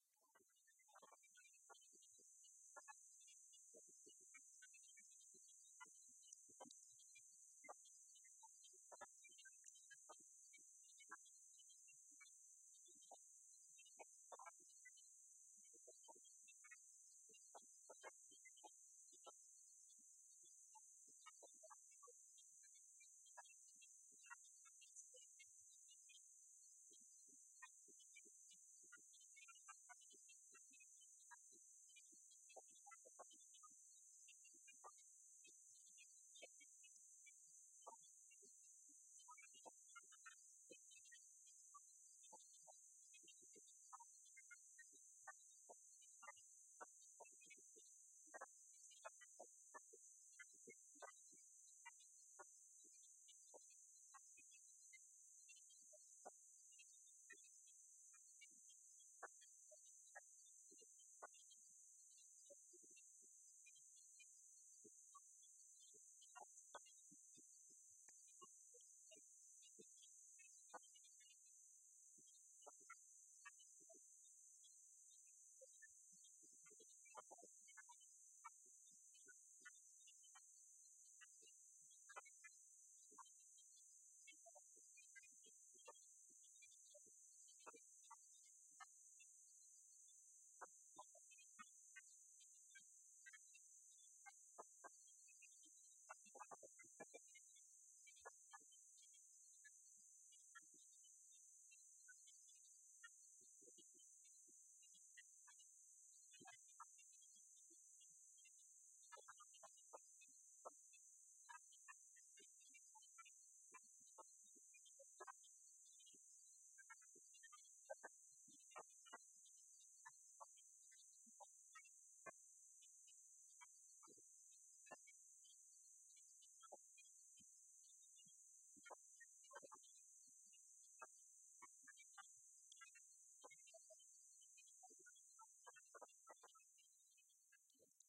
tropical savanna in brazil
Recording in a field in Brazil using a built-in mic in a digital camera.
brazil, field, recording